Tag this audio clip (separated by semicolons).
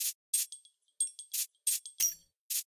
atomic; free; hiphop; lofi; percussion